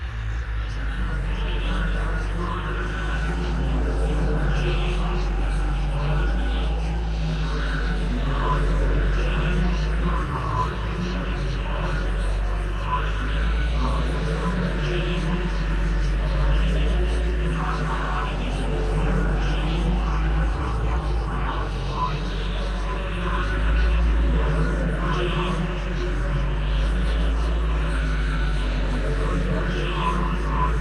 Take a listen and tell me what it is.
Heavily relying on granular synthesis and convolution